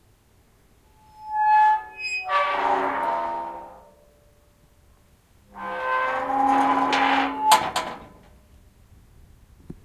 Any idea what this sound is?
iron gate opening and closing. field recording cemetery
gate, portal, squeaky